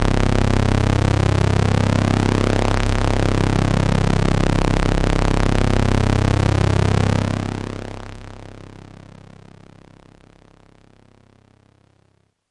Overdrive (Sys100) 0000
Sound from a Roland System 100 semi modular synth.
roland, analogue, sample